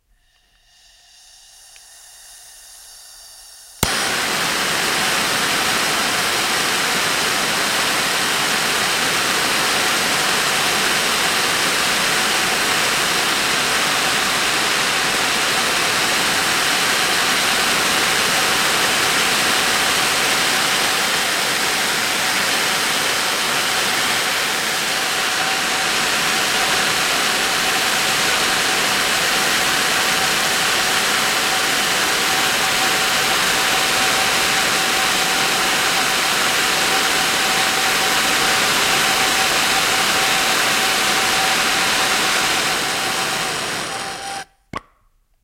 blowtorch light and run flame good detail on off2
flame, run, blowtorch, detail, good, off, light